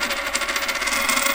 A short sample of coin twanging just before landing completely. You can loop and get an interesting sound.
Recorded by Sony Xperia C5305.